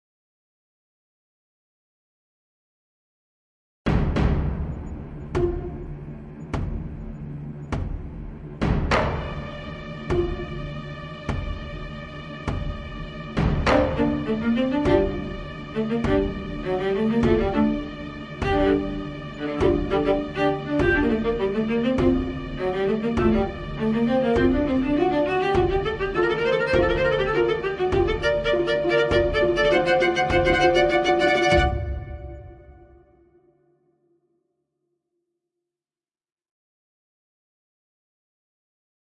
Orchestral score in D-minor ending in a slight crescendo. This version is mastered with stereo imaging.
Taken from my Viking audio drama: Where the Thunder Strikes
Check that story out here:
Never stop pluggin', am I right? haha.
I hope you this will be useful for you. Cheers~